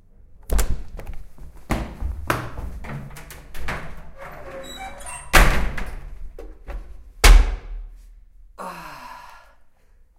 sick stomach
Going fast to the toilet.